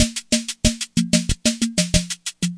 Samba Drum Loop extracted from the Yamaha PS-20 Keyboard. If I'm not mistaken, all drum loops are analog on this machine
Yamaha, lo-fi-loops